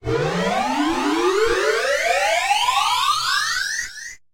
Time Travel - Future
A sound derived from my "square wave build up" sfx.
Edited to sound like traveling through time.
This sound, as well as everything else I have upload here,
is completely free for anyone to use.
You may use this in ANY project, whether it be
commercial, or not.
although that would be appreciated.
You may use any of my sounds however you please.
I hope they are useful.
abstract, teleport, machine, gamesfx, worm-hole, transport, beam, sound-design, future, time-machine, travel, time, fx, time-travel, efx, sound, portal, go, time-portal, warp, game, flutter, effect, sfx, sci-fi, free